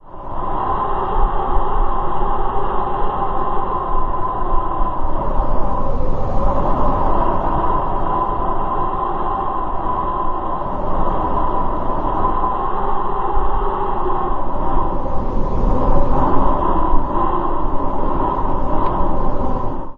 desertwind1FINAL
A long desert wind sound. Created by inhaling through an emergency whistle and edited in Audacity. Enjoy!
desert, dust, environmental, gale, sand, tornado, wasteland